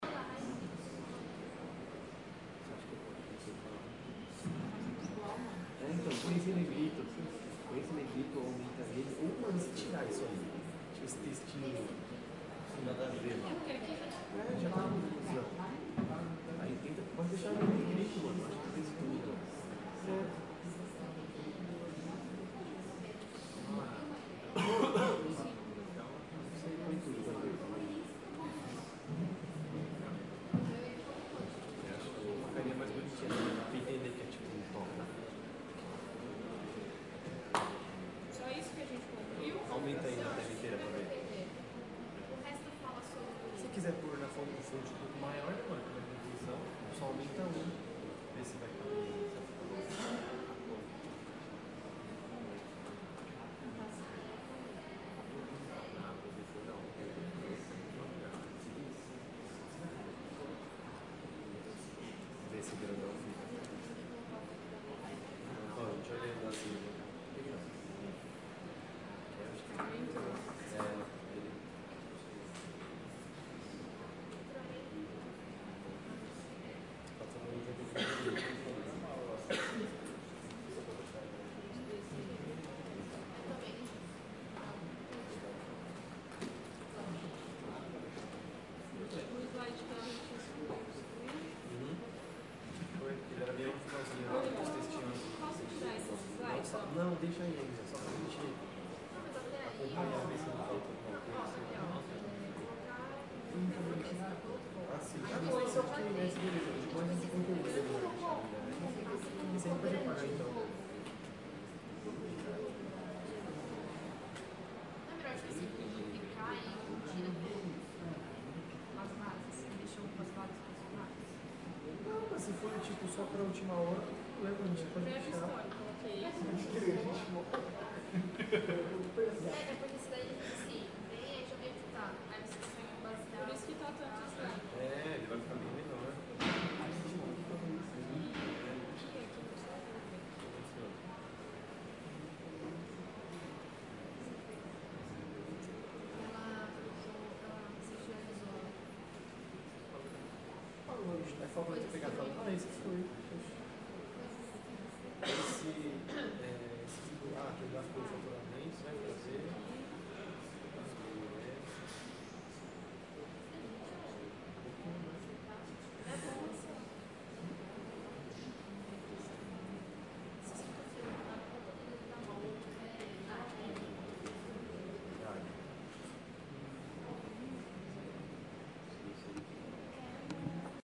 Som ambiente de uma biblioteca, utilizado gravador tascam
Gravado para a disciplina de Captação e Edição de Áudio do curso Rádio, TV e Internet, Universidade Anhembi Morumbi. São Paulo-SP. Brasil.
studying, ambience, Library, biblioteca, ambiente, Anhembi, estudando, som